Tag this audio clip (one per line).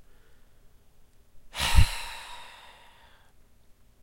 breath
human
soundeffect
voice